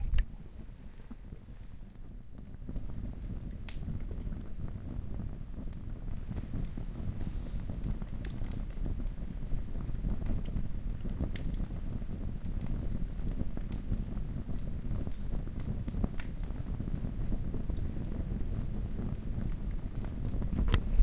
Fire Crackle and Flames 002
Crackling log fire with dancing flames.
burning, crackle, Fire, flames, log